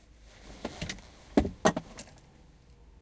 Honda CRV, interior, seat belt being fastened. Recorded with a Zoom H2n.